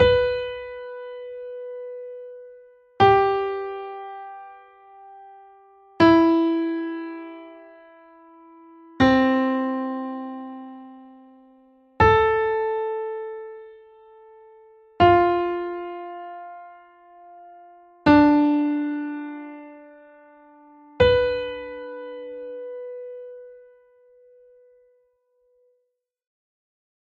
B minor Locrian
locrian, minor